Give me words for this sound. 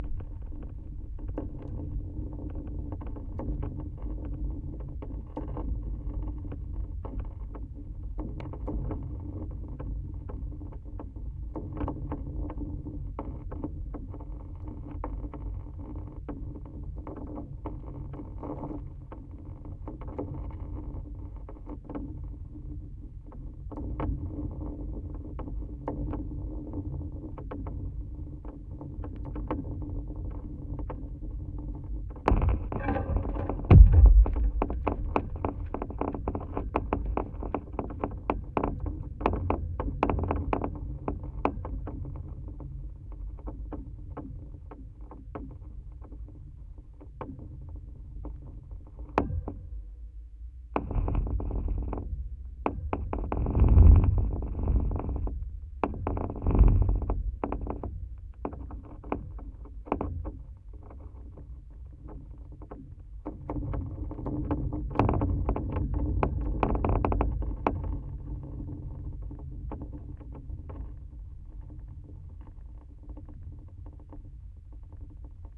A stereo contact-microphone-recording of hitting a wire with a stick. The mics are mounted about two meters apart on a 50 meter steel-wire hanging over a marsh in the forest. The recording has some coarse compression, but otherwise uneditet/processed. Beware of high bass!